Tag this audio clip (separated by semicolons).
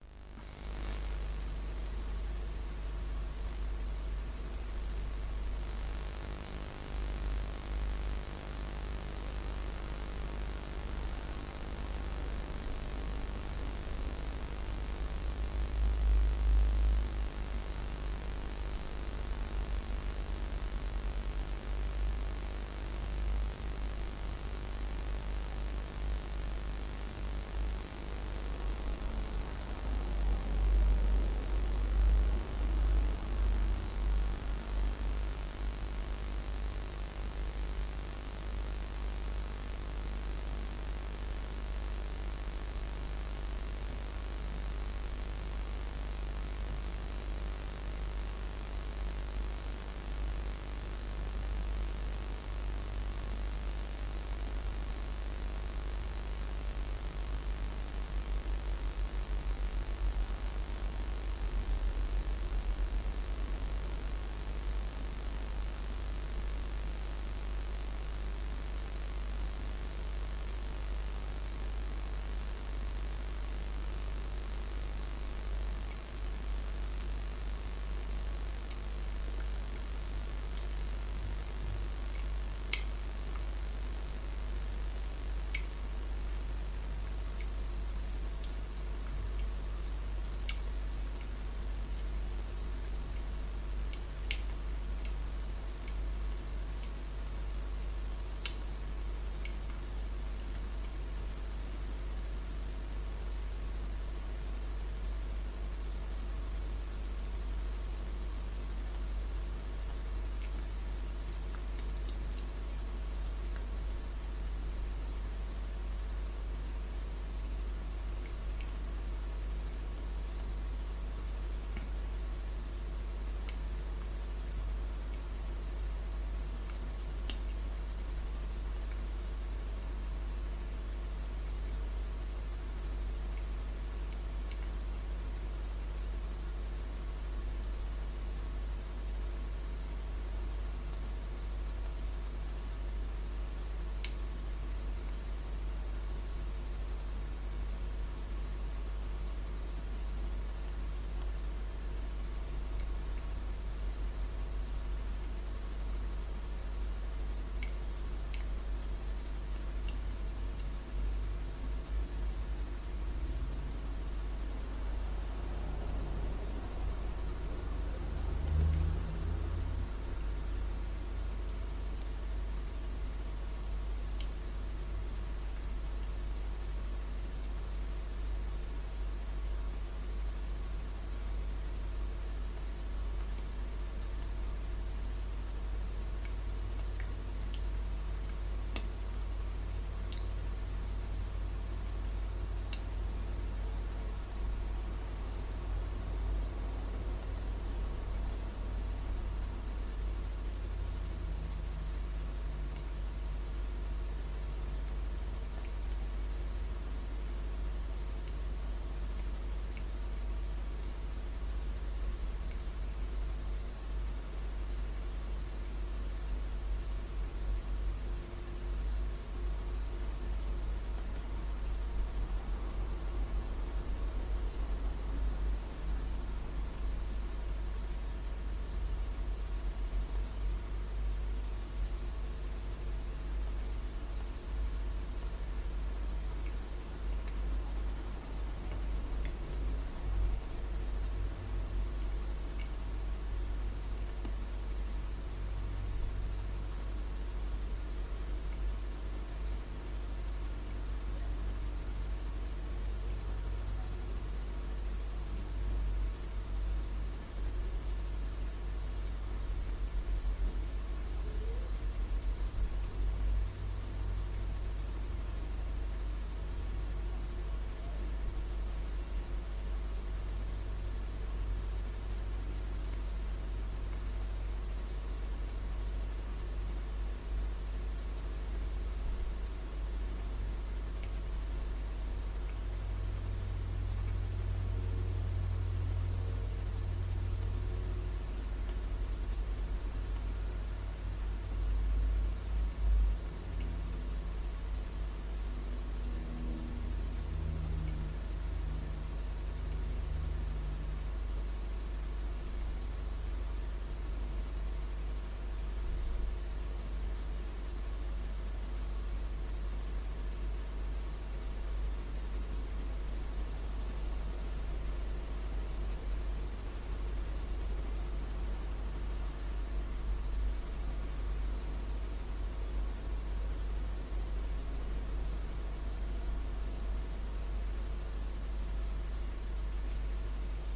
Spectrum; Electric; Scalar; Iso; Filter; Trail; Channel; Solar; Symmetry; Linear; Unit; Engine; WideBand; Fraser; Wave; Beam; ATV; Broadband; Battery; ECU; Hysteresis; Lens; Mirror; Path; Jitter; Synchronous; UTV; Control; Load; Curve